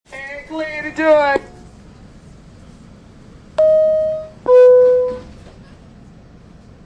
subway PA stand clear of door +beeps NYC, USA
stand, beeps, USA, PA, NYC